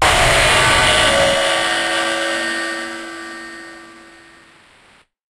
DrumPack002 Overblown Glitched Splash (0.50 Velocity)
How were these noises made?
FL Studio 21
Track BPM: 160
Instruments: FPC
Drumset / Preset: Jayce Lewis Direct
Effects Channel:
• Effect 1: Gorgon
◦ Preset: Alumnium Octopus (Unchanged)
◦ Mix Level: 100%
• Effect 2: Kombinat_Dva
◦ Preset: Rage on the Kick (Unchanged)
◦ Mix Level: 43%
• Effect 3: Kombinat_Dva
◦ Preset: Loop Warmer (Unchanged)
◦ Mix Level: 85%
Master Channel:
• Effect 1: Maximus
◦ Preset: NY Compression (Unchanged)
◦ Mix Level: 100%
• Effect 2: Fruity Limiter
◦ Preset: Default (Unchanged)
◦ Mix Level: 100%
What is this?
A single 8th note hit of various drums and cymbals. I added a slew of effects to give a particular ringing tone that accompanies that blown-out speaker sound aesthetic that each sound has.
Additionally, I have recorded the notes at various velocities as well. These are indicated on the track name.
As always, I hope you enjoy this and I’d love to see anything that you may make with it.
Thank you,
Hew
Distorted, Distorted-Drum-Hit, Distorted-Drums, Distorted-One-Shot, Distorted-Single-Hit, Distorted-Splash-Cymbal, Distorted-Splash-Cymbal-One-Shot, Distorted-Splash-Cymbal-Single-Hit, One-Shot, Overblown-Splash-Cymbal, Single-Hit, Splash, Splash-Cymbal, Splash-Cymbal-One-Shot, Splash-Cymbal-Single-Hit